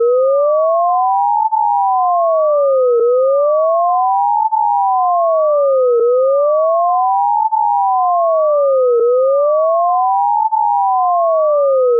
TAKHALLOUFT Farrah 2017 2018 POLICE-SIREN
It's a synthetised police siren. You can use it for a video, or a game for exemple.
I used audacity to make it.
To make it there is the different steps
- DTMF Tones carré fréquence 150 amplitude 0,8 (DTMF Tones square frequency 150 amplitude 0.8)
- Chirp Sinusoide fréquence début 460 fin 1300 amplitude début 0,8 fin 0,1 interpolation linéaire (Chirp Sinusoid frequency beginning 460 end 1300 amplitude beginning 0.8 end 0.1 linear interpolation)
- Dupliquer (duplicate)
- Inverser sens (Reverse direction)
- Normaliser( Normalize)
- Mixage et rendu (Mixing and rendering)
- Fondu d’ouverture ( Fade out openning)
- Fondu fermeture ( Fade out closing)
- Répéter le son x3 (repeat the sound x3)
911, alarm, ambulance, apocalypse, car, catastrophy, cop, emergency, fi, fire, firetruck, helicopter, high, nypd, pitch, police, police-scanner, rescue, scanner, sci, siren, sirens, traffic, zombie